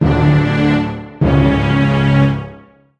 Stereotypical drama sounds. THE classic two are Dramatic_1 and Dramatic_2 in this series.
orchestral, movie, film, cinema, dramatic, drama, cinematic, suspense, tension